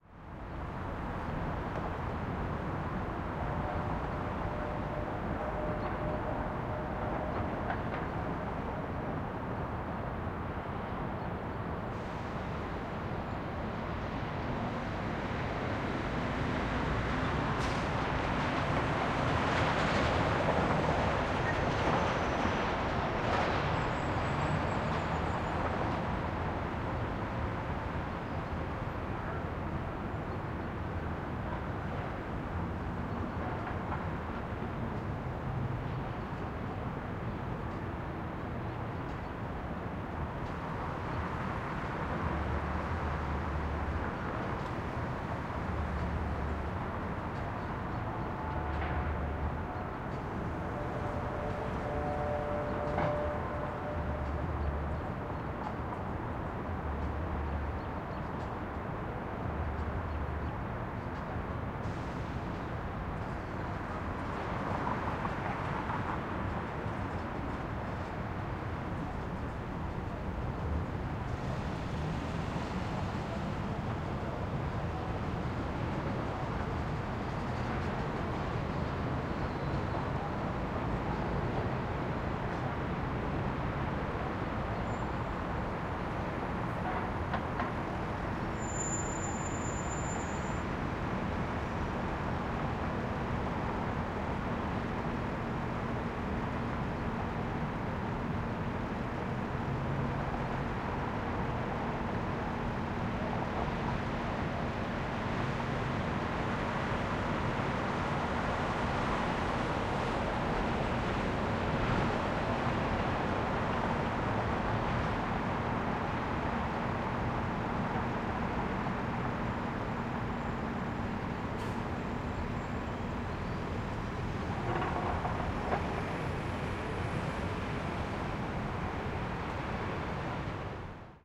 Baltimore City Ambience at Dusk, ideal for a small city recording where it's urban and noisy but still needs some natural elements, like birds.